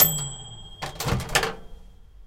opening microwave
Microwave opening with one "cink" on the beginning.